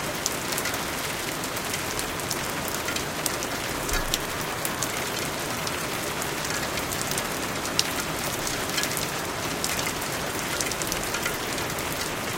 Rain which can be looped, this has been recorder with my Blue Yeti.
Loop, Bad, heavy, Rain, Thunder